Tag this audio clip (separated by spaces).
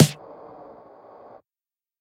drum experimental hits idm kit noise samples sounds techno